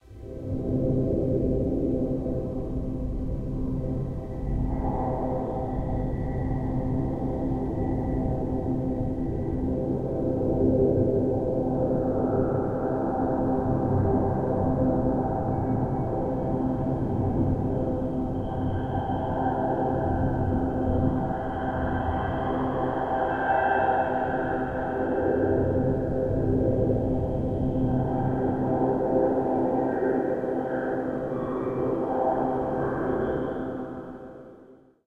Atmospheric Seq 2
A mysterious sequence good for Sci-Fi movies. Sample generated via computer synthesis.
Alien, Outer, Outer-Space, Sci-Fi, Sequence, Space, Strange, Synthetic, Weird